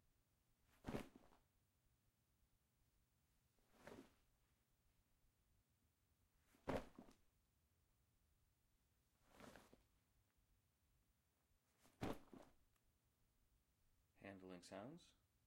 A leather bag being dropped and picked up several times.
Recorded in stereo on a Tascam DR-05. Raw audio with a 6db 5000hz low pass filter, and a 6db 100hz high pass filter.
picked, leather, up, drop, bag